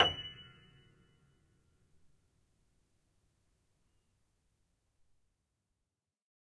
upright choiseul piano multisample recorded using zoom H4n
choiseul, multisample, upright